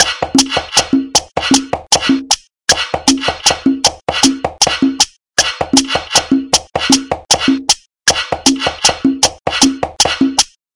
A drum pattern in 7/8 time. This is my second pack.
kit, 07-08, pattern, 07, 8, full, 08, drum, 7-8